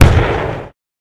Tank Firing
army, attack, bang, boom, cannon, canon, destroy, destruction, fire, firing, military, shot, tank